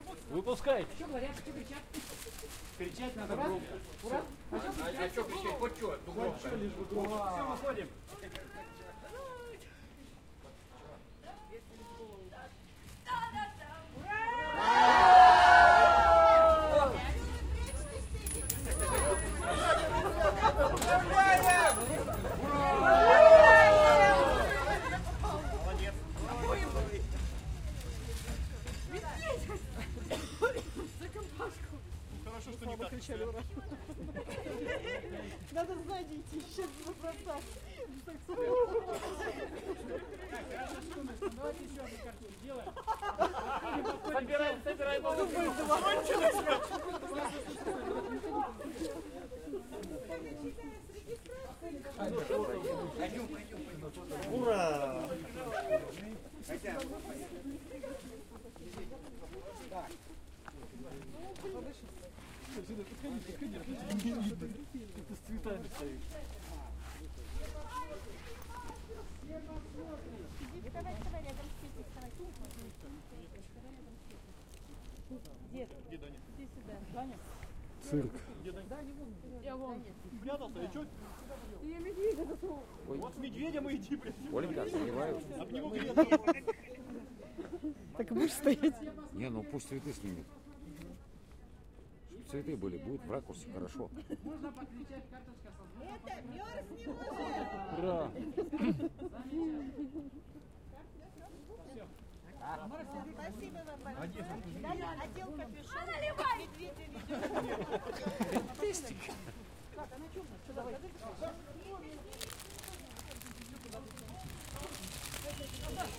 wedding guests
Guests at the wedding are expecting the newlyweds. Cheers. The rustle of the wrapper of the bouquets. Coins are poured onto concrete steps. Conversations in Russian.